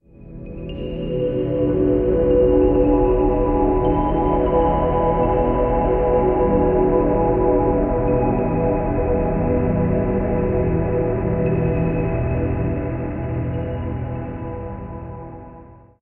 This pad sound was made with Reason and is very soothing with some weird twinkly sounds off in the distance.